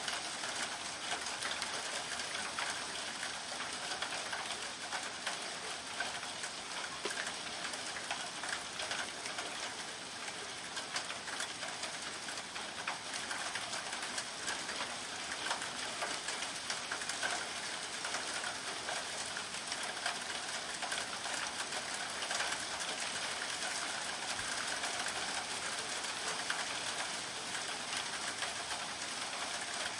Soft Rain
ambience, ambient, atmosphere, background-sound, soundscape